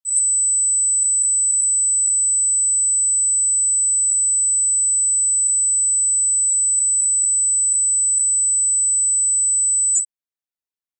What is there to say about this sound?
sine wave signals-8hz
Pack of sound test signals that was
generated with Audacity
audio, signal, sound, test